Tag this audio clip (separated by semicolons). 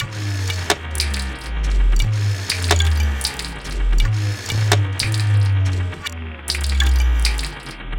atm; bottle; drum; modem; sampled; techno